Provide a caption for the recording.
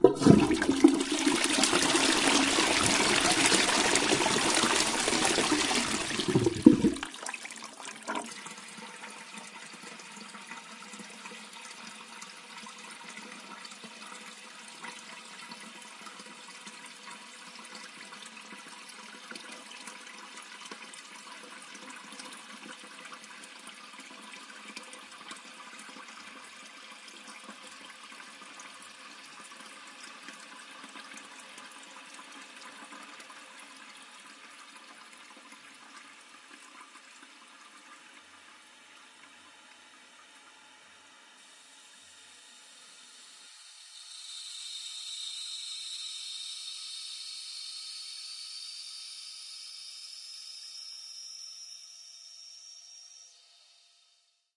This was recorded at a friend's home in London, England, in April 2009. I used an Audio Technica AT-822 single-point stereo microphone hooked up to a Zoom h4 recorder.